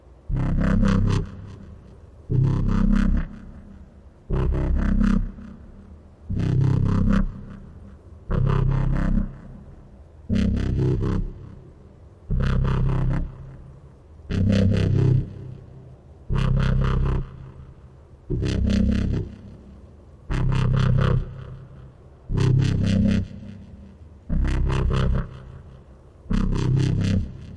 a minimal dubstep wobble made in reason .